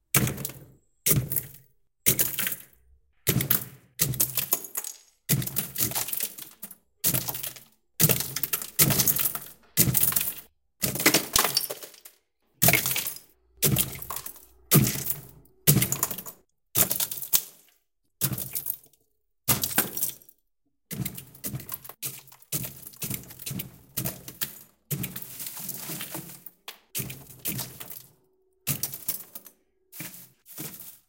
Breaking Ice
breaking the refrigerator ice with a knife
bottle, break, clang, container, crack, crash, freeze, hit, ice, iron, nail, noise, percs, rubbish, sticks, stone, strike, wood